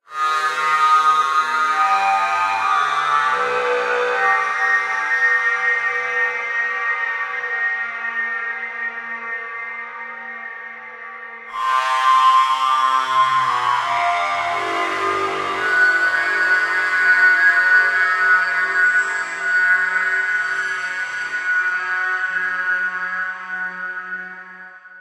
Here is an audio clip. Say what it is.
Time-Stretched Electric Guitar 5
An emulation of an electric guitar, synthesized in u-he's modular synthesizer Zebra, recorded live to disk and edited and time-stretched in BIAS Peak.
blues, electric, guitar, metal, psychedelic, rock, synthesized, time-stretched, Zebra